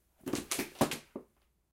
A body falling to a wood floor, natural reverberation present.